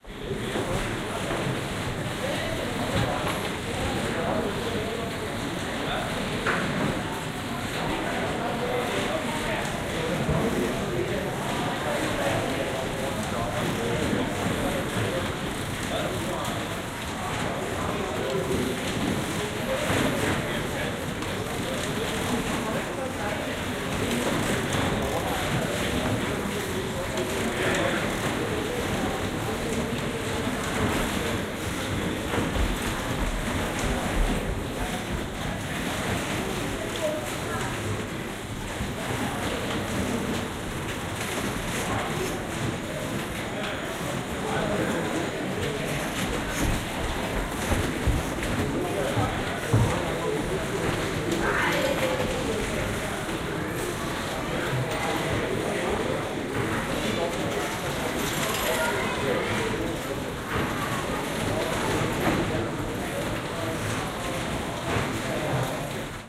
10-Bakken GamblingMachines Hall calm
Bakken – the world’s oldest amusement park north of Copenhagen, Denmark.
Recordings 24. August 1990 made with Sennheiser binaural microphones on a Sony Walkman Prof cassette recorder in a hall with gambling machines. The atmosphere is calm with some reverberation.
ambience, amusement, gambling, machines, park